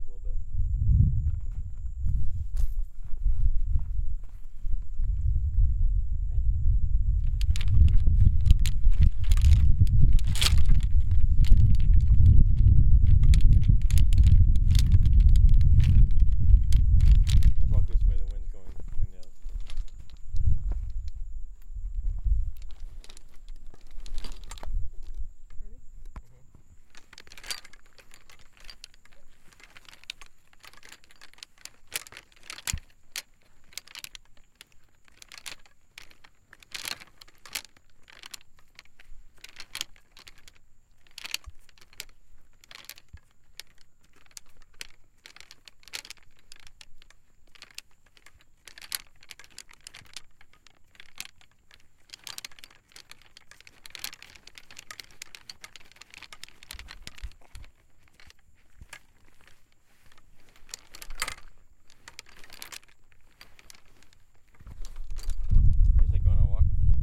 The sound of golf clubs rattling around in a golf bag. Quite of bit of wind noise and crickets, but there are points of clarity. "Golf Bag 2" is better. Recorded on a DR07 mkII in Southwest Florida.
If you can, please share the project you used this in.